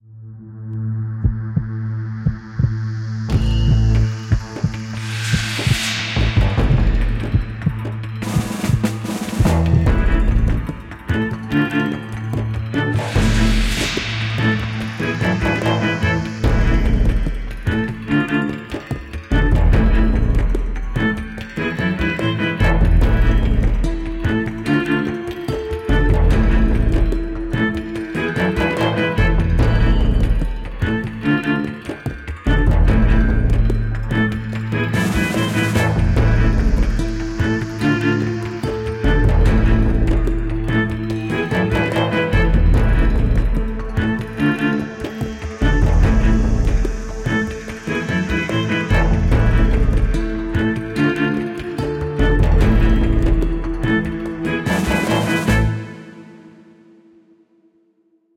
waiting 57sec
exclusively done with logic pro.. main sounds used are violins N persian santoor to give that mid-east feel to it.
I named it waiting, coz i feel thats what its use for in a clip where you have a brief intersession ...pondering , contemplating or whatever....
MID-EAST, santoor, Style, violins